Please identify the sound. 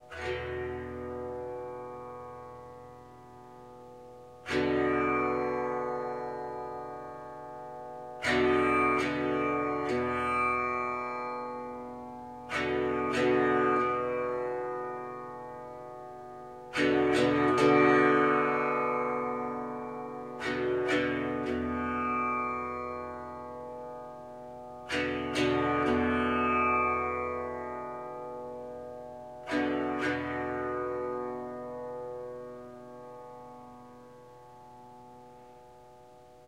Snippets from recordings of me playing the tanpura.
The strings are tuned to B, D, G and E, so would work well in the keys of G or E minor.
I noticed that my first pack of tanpura samples has a bit of fuzzy white noise so in this pack I have equalized - I reduced all the very high frequencies which got rid of most of the white noise without affecting the low frequency sounds of the tanpura itself.
Please note this is the tanpura part of an instrument called the Swar Sangam which combines the Swarmandal (Indian Harp) and the Tanpura, it is not a traditional tanpura and does sound slightly different.